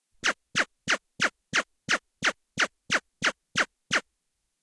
Shooting Laser
Space, Laser